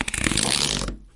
Queneau carton rapide 06
grattement sur un carton alveolé
pencil, scribble, cardboard, scrape, paper, scratch